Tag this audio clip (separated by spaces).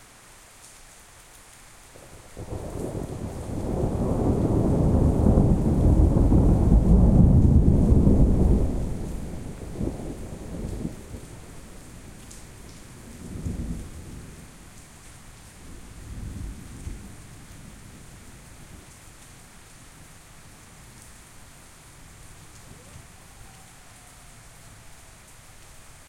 loud rain